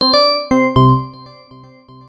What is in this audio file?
I made these sounds in the freeware midi composing studio nanostudio you should try nanostudio and i used ocenaudio for additional editing also freeware
clicks, sfx, application, desktop, sound, bootup, game, startup, bleep, blip, event, effect, click, intro, intros